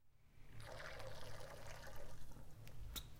Bubbling noises made by my cheap water bottle